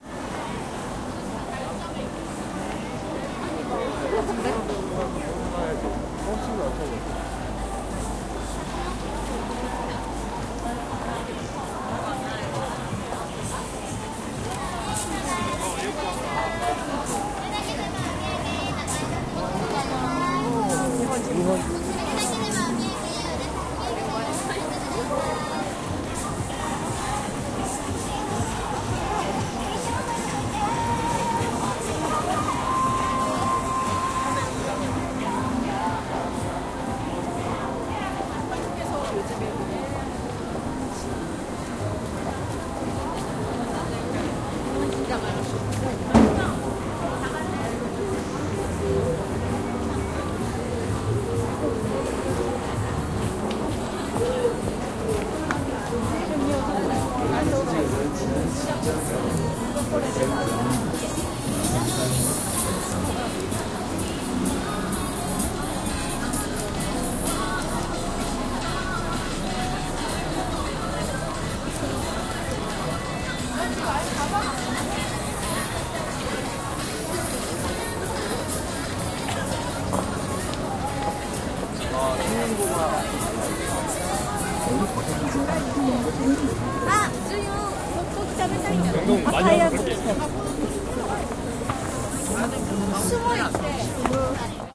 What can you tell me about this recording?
0163 Shopping street 2 Myeong-dong
People in a shopping street. Walking and talking.
20120212
field-recording, korean, seoul, steps, korea, voice